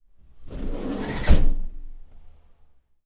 Shutting van sliding door
The side door to a van sliding closed